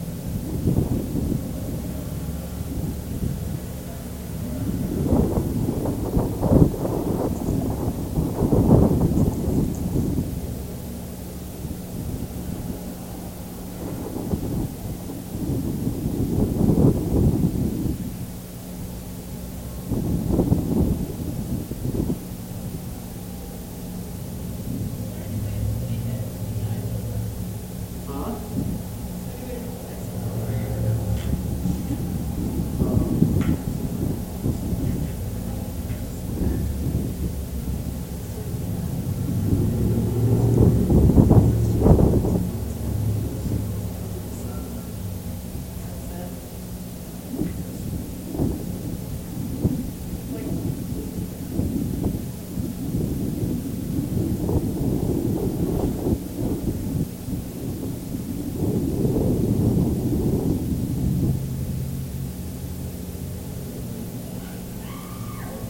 Denver Sculpture Scottish Cow
Contact mic recording of bronze sculpture “Scottish Cow” by Dan Ostermiller, 2006. This sits just to the east of the Denver Art Museum. Recorded February 20, 2011 using a Sony PCM-D50 recorder with Schertler DYN-E-SET wired mic; mic on the muzzle. Plenty of wind noise.